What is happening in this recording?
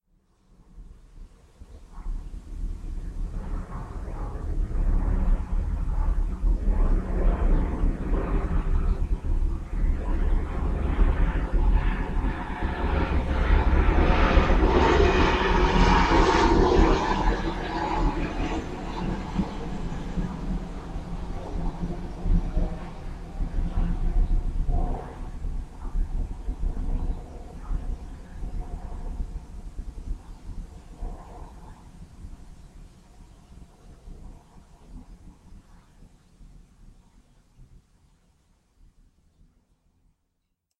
Random plane recorded from my window with a tape recorder.
aeroplane, aircraft, airplane, aviation, flight, flying, jet, plane